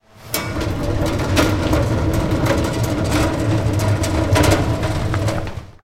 This sound was recorded inside the upf poblenou library. We can hear an empty metalic trolley being carried by a library employee. The recording was made with an Edirol R-09 HR portable recorder and it followed the movement of the trolley. The microphone was separated 40 centimetres from the source.